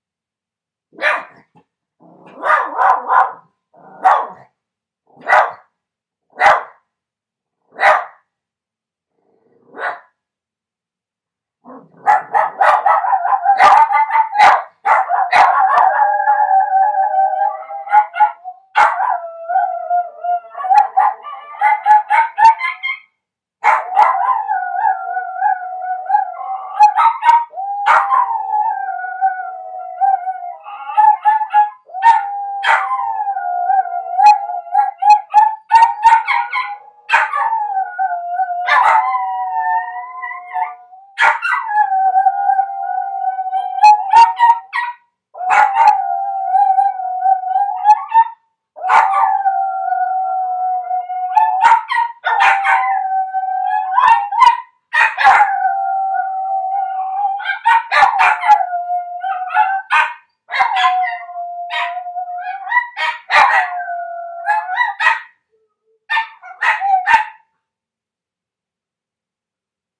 Our dogs were left at home alone and there was some noise outside. (cut out from a whole day recording)